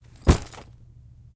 Luggage Drop 3
Dropping a luggage bag full of various items.
Drop, Foley, Heavy, Luggage, Punch, Thud